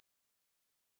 borderline hearing
HURTFUL TO SOME EARS! The sound created was made by a small 1 second beeping sound sound effect. The frequency was increased to 16,000 Hz and set to a wave amplitude of 0.01! This sound was tested on a group of ten subjects between the ages of 15-40 and was only heard by 3 of them. They were all under the age of 18. When played around small household dogs and cats it seems to get their attention but not send them running. Probably a good bet for a ringtone that you don't want your teachers to hear. Is being used in my companies new upcoming movie inspired by David Lynchs' "Eraser Head" to make our audience mad and make us unforgettable by them.
beep, borderline, frequency, high, hurtful